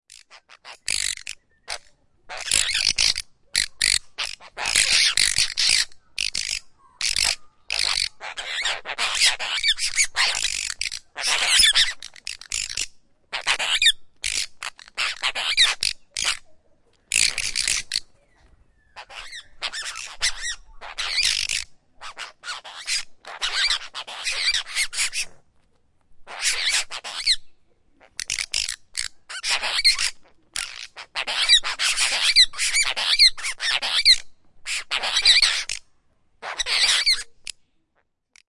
Apostle Birds
Recorded these cheeky group of birds with my Zoom H4.
ambience, apostle, apostlebird, atmos, atmosphere, Australia, australian, birds, field-recording, grating, growling, nature, Struthidea-cinerea